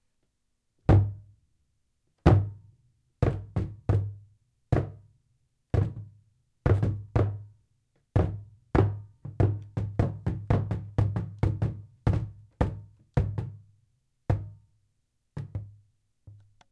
kicking medium-box
kicking a medium-sized wooden box. recorded with a TASCAM DR-07 mk2. unprocessed.
knocking, knock, foley, bump, box, wood, scratch